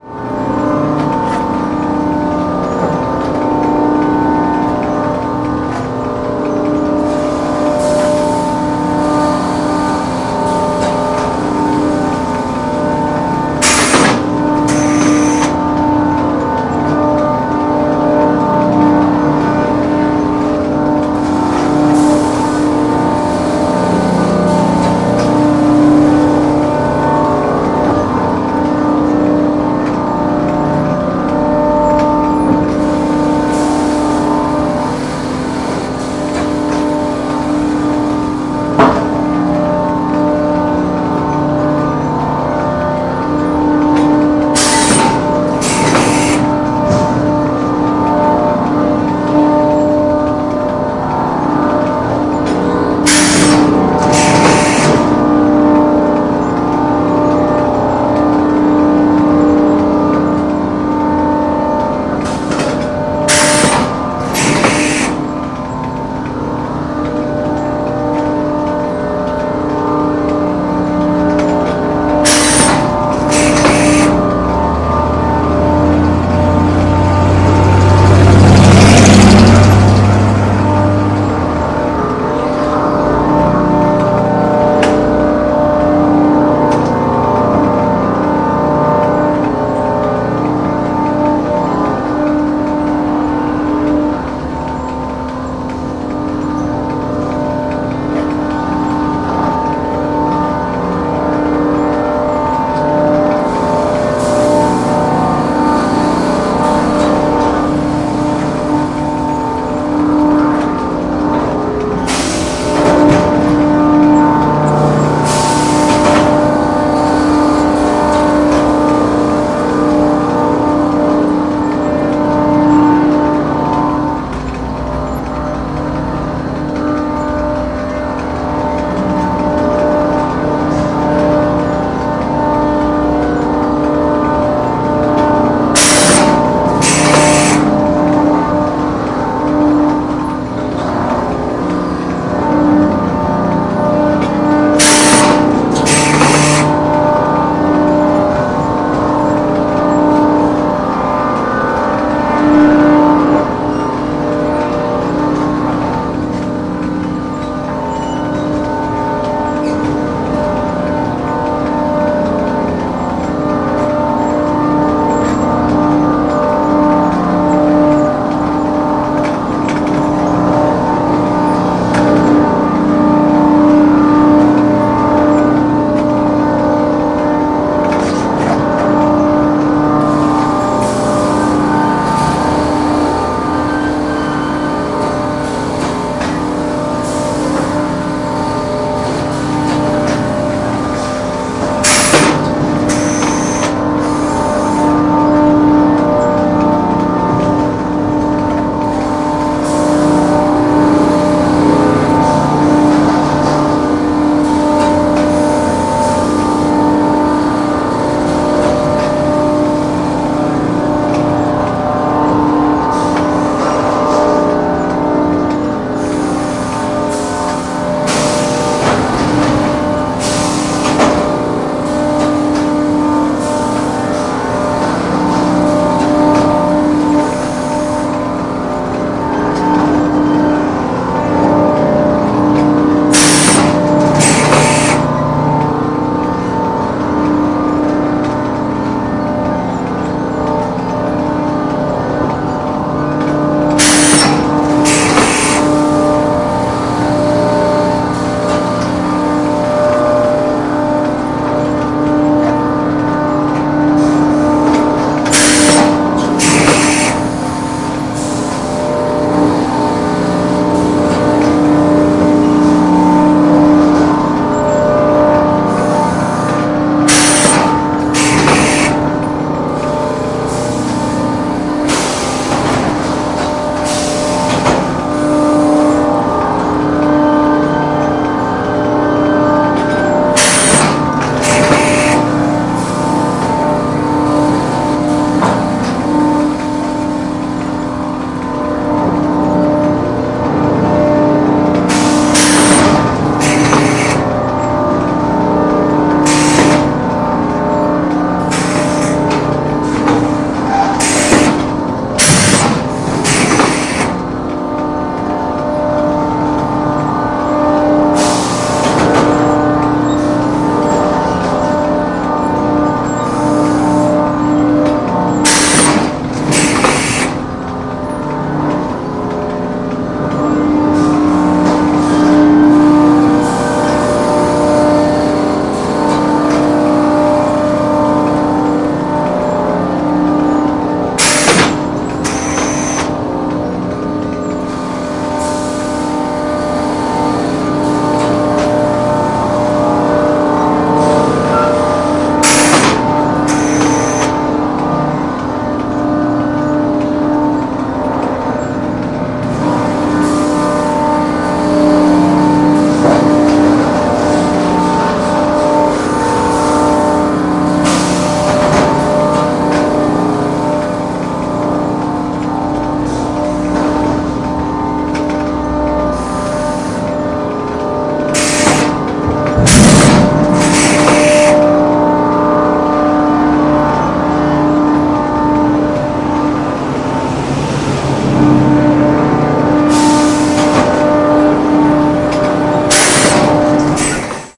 redmond mill
Recording of mill noise near the Redmond Airport (RDM). Not sure just exactly what the mill does. Includes light traffic on the adjacent road. Recorded with the Zoom H2 Handy Recorder.
oregon, mill, industrial, field-recording, traffic, loading, redmond